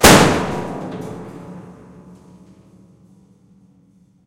Piano Destruction - Crash
A partially destroyed piano pushed over and crashing to the ground. The recording is a little clipped/overloaded at the start but this is not terribly audible so the sound should still be useful. Recorded in 2001.
splintering, snap, keyboard, crash, smash, destruction, crack